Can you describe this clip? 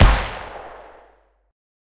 A single gunshot, made in LMMS (adding FX).
firing, shoot, attack, bullet, warfare, live-fire, fire, war, military, fps, pistol, shooting, shooter, shot, reload, rifle, weapon, army, gunshot, projectile, sniper, gun, soldier